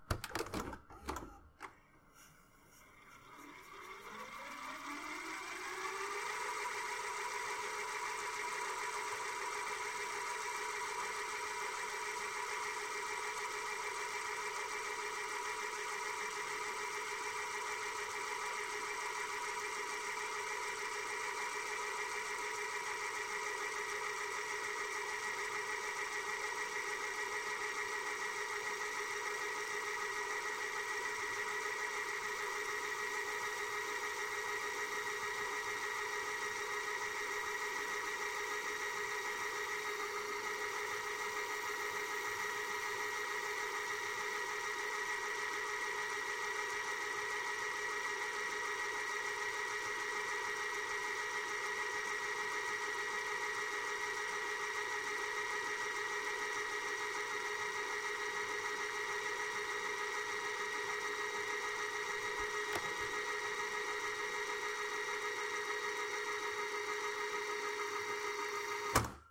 vcr fastforward
Recorded my vcr with my Blue Snowball